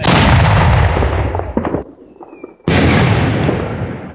explosion long 01
Made with fireworks